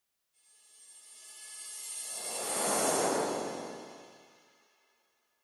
Long Flashback Transition
A short, whooshy sound for use during a cinematic transition to a flashback or something. I made it for my short film and it was very useful, so I thought I'd share for free.
adobe-audition, cymbal, effect, fade, flashback, free, transition, whoosh, woosh